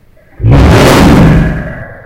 i recorded myself yelling "NO" and i used audacity to lower the pitch and i made it louder and added an echo then i recorded myself making a weird sound and lowered the pitch and echoed and made it louder and put then together WHY WAS THIS SO HARD TO FREAKING TYPE
scary, audacity